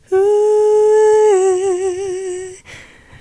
Female Voc Long Notes Higher 2
female, long, sing, vocal, voice